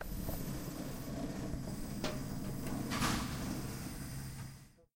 Waterfalling Watersource
Water falling from a water source. It has been recorded with the Zoom Handy Recorder H2 in the hall of the Tallers building in the Pompeu Fabra University, Barcelona. Edited with Audacity by adding a fade-in and a fade-out.
campus-upf, drink, fountain, hall, tallers, university, UPF-CS14, water, watersource